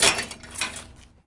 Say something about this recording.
Dropping hangers in bin
Dropping a few hangers in a metal bin at the dry cleaners. Barely any clear frequency peaks.
clothing, dry-cleaners, metal